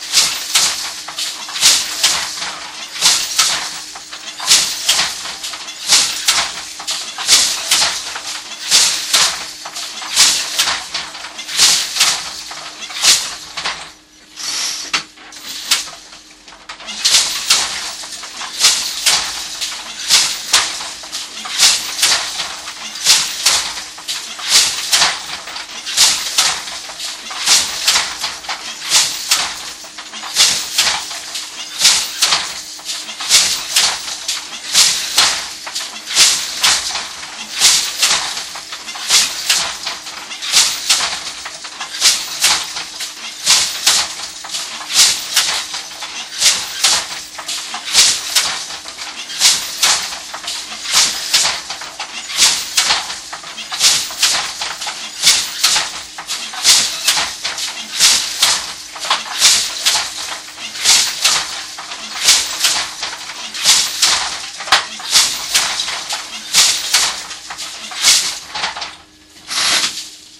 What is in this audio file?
Working a Loom
This is the sound of a young woman working on a loom at the back of a small textiles shop in Bath, England. Struck by the sound pouring out of the open door on a sunny day as I walked by, I decided to ask if she'd mind if I recorded her at work.
LOOM, MACHINERY, TEXTILES, WEAVING